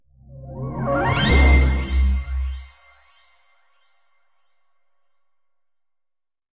Creative Sounddesigns and Soundscapes made of my own Samples.
Sounds were manipulated and combined in very different ways.
Enjoy :)
Arpeggio, Bright, Crescendo, Opening, Radiant, Rising, Sound-Effect, Spark, Synth, Welcome